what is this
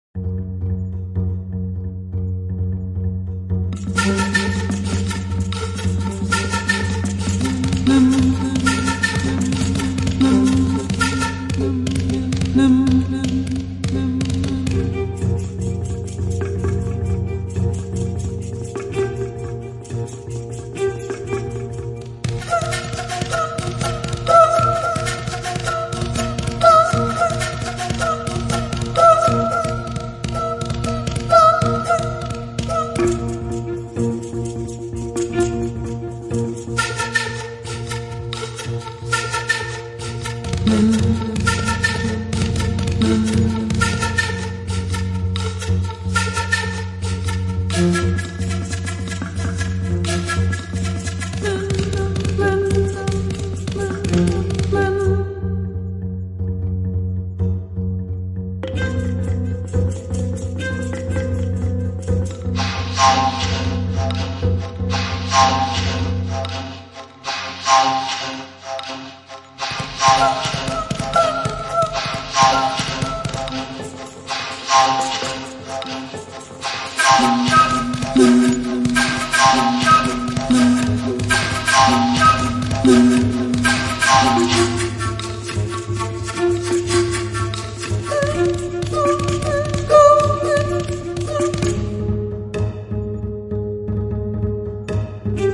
Ethno Folk Accoustic Dark Horror Experimental Thriller Sad Mood Music Atmo Ambience Cinematic Film Movie 131Bpm Surround